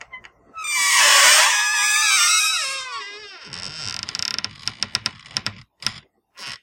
Door-Pantry-Squeak-04
I got this sound from this old pantry squeaking while being opened and closed.